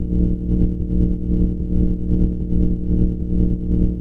HF Computer Hum C
The humming of my computer! Exciting!
computer, pulse, drone, buzz, humm